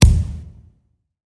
VSH-04-fist-thump-concrete wall-short
Concrete foley performed with hands. Part of my ‘various hits’ pack - foley on concrete, metal pipes, and plastic surfaced objects in a 10 story stairwell. Recorded on iPhone. Added fades, EQ’s and compression for easy integration.
concrete, concrete-wall, concretewall, crack, fist, hand, hit, hits, human, kick, knuckle, pop, slam, slap, smack, thump